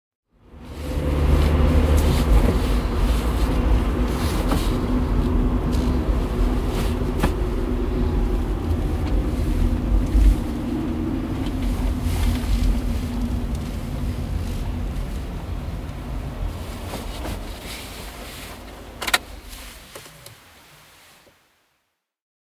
Arriving with VW Polo, recorded with H2n inside car